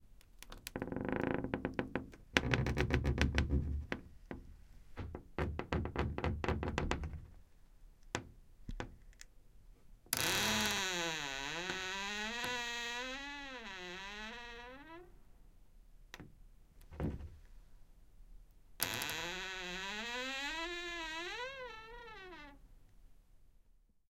A wardrobe's wooden door opening with three different creaking/squeaking sounds, recorded on Zoom H2.